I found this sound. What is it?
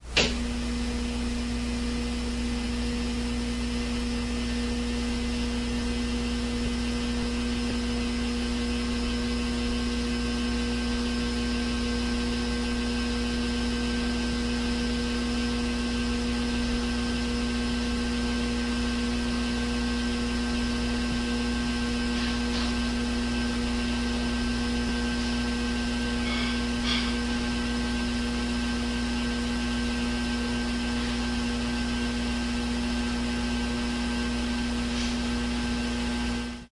noisy ceiling fan
A noisy poorly grounded ceiling fan starting up recorded with DS-40 and edited in Wavosaur.
ceiling, fan